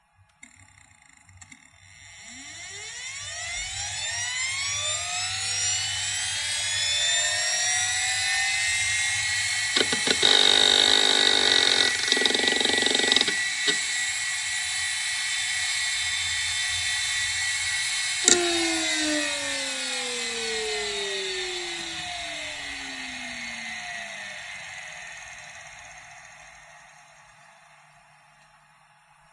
IBM Ultrastar ES - 5400rpm - BB
An IBM hard drive manufactured in 1996 close up; spin up, seek test, spin down.
(ibm dors-32160)
disk, drive, hard, hdd, machine, motor, rattle